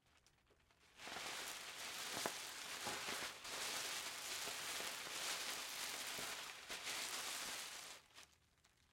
package rustling
some packaging being rustled around.